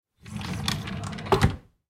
Close drawer
Closing a drawer of a wooden cabinet.
Recorded with a ZOOM H2N.
wooden-cabinet, drawer, closing, shut, close